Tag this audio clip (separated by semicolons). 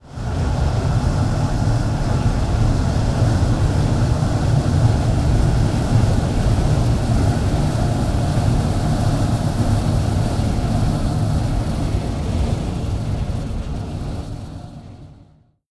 role-playing-game; scary; video-game; rpg; game-design; wind; sci-fi; fantasy; voices; danger; creepy; action; dark; feedback; adventure; transition; game-sound; ghosts